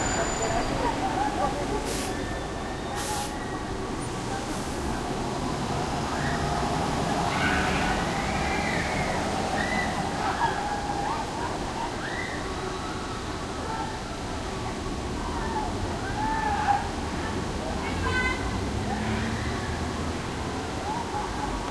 Ambiance in the streets of Copenhagen, near the Tivoli Park. You can hear people screaming at the attractions
ambiance, city, field-recording, streetnoise, summer